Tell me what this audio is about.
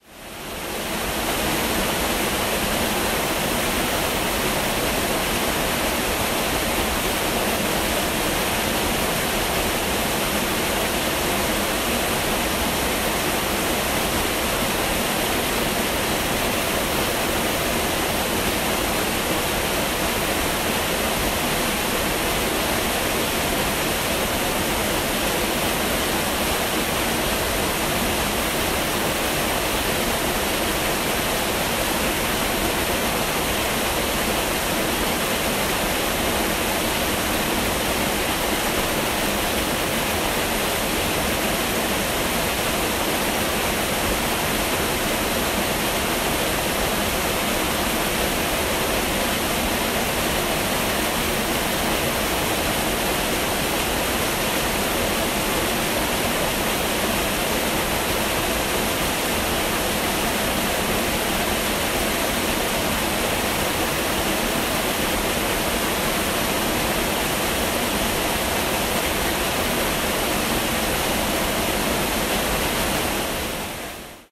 A little stream flows under a wooden bridge. Recorded with an iPhone 7.